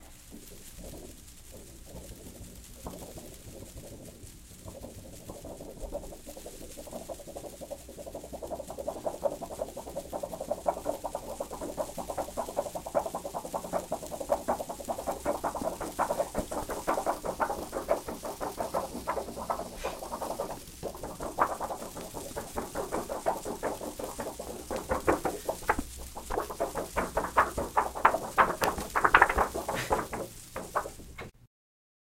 Shaking a Palmtree
shaking a palm tree anda a paper sheet at the same time.
Cali, paper, shake, sheet, Technica